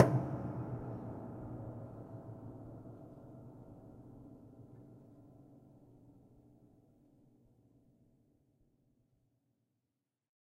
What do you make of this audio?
Hit avec pedale 3
hits on the piano with sustain pedal "on" to complete a multisample pack of piano strings played with a finger